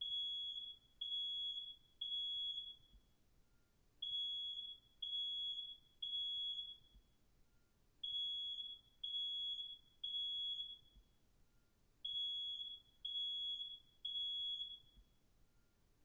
Smoke detector alarm, distant neighboring room perspective
Smoke alarm as heard through a neighboring room
alert, beep, smoke, alarm, chirp, detector